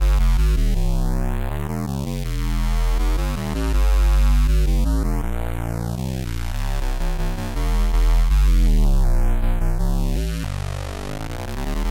all square 8 bar.L
8 bars of square bass, flanged, good for a hip hop or d&b bassline.....
square, drum, bass, bassline, modified, hop, jungle, hip